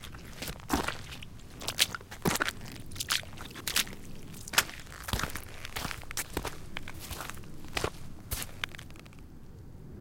boots water step creaky dirt gravel
Walking in a puddle
boots, creaky, dirt, foley, footsteps, gravel, step, walk, walking, water